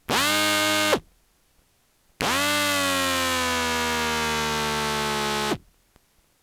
Phone transducer suction cup thing on various places on a remote control boat, motors, radio receiver, battery, etc.